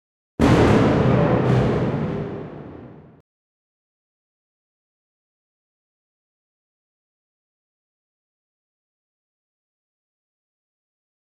future thunder1
sfx for rocky horror. more "future thunder"